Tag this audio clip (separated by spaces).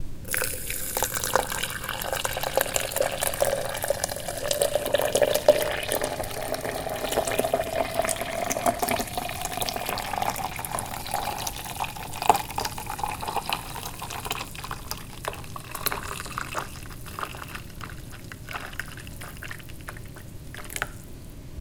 cooking domestic-sounds field-recording